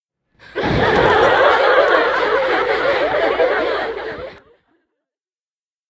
Apparently I made this for my animation which supposedly a parody of sitcom shows...and since I find the laugh tracks in the internet a little too "cliche" (and I've used it a bunch of times in my videos already), I decided to make my own.
So, all I did was record my voice doing different kinds of laughing (mostly giggles or chuckles since I somehow can't force out a fake laughter by the time of recording) in my normal and falsetto voice for at least 1 minute. Then I edited it all out in Audacity. I also resampled older recordings of my fake laughters and pitched down the duplicated tracks so that it would sound "bigger".
Thanks :)